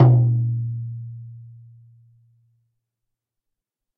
Shaman Hand Frame Drum 05
Shaman Hand Frame Drum
Studio Recording
Rode NT1000
AKG C1000s
Clock Audio C 009E-RF Boundary Microphone
Reaper DAW
hand, shamanic, shaman, drum